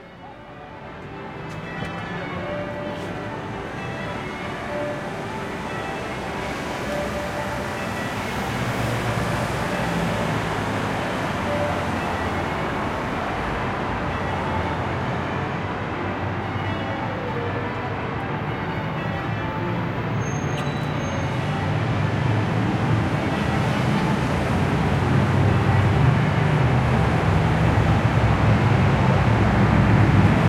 130216 - AMB EXT -Chapel bells with traffic
Recording made on 16th feb 2013, with Zoom H4n X/y 120º integrated mics.
Hi-pass filtered @ 80Hz. No more processing
More traffic with bells
traffic, street, bells